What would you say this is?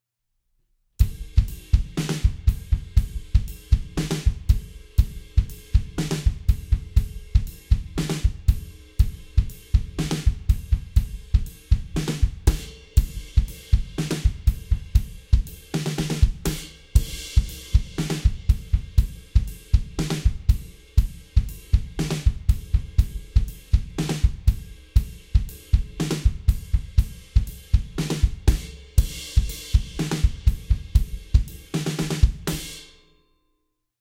mLoops #10 120 BPM
A bunch of drum loops mixed with compression and EQ. Good for Hip-Hop.
150, Acoustic, Beats, BPM, Compressed, Drum, Electronic, EQ, Hip, Hop, Loop, mLoops, Snickerdoodle